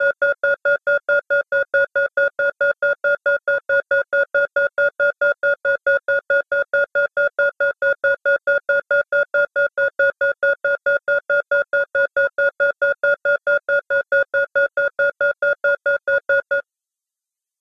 agitated alarm
An alarm effect that sounds a bit like some alert going off in an airplane cockpit. Made from a very slowed down recording of me whistling, with the help of DFX Skidder.